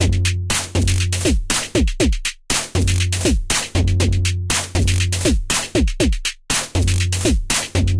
Aerobic Loop -12
A four bar four on the floor electronic drumloop at 120 BPM created with the Aerobic ensemble within Reaktor 5 from Native Instruments. Very danceable, very electro. Normalised and mastered using several plugins within Cubase SX.